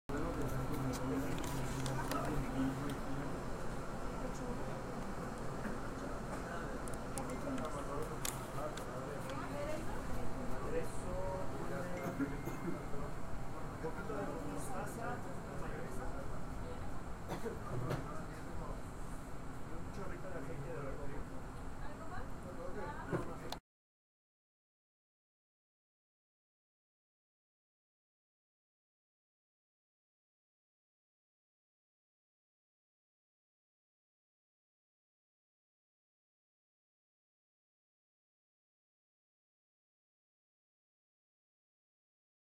ambient subWay
ambient sound in a subway
superMarket, restaurant